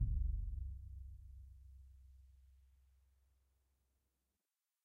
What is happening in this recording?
Ludwig 40'' x 18'' suspended concert bass drum, recorded via overhead mics in multiple velocities.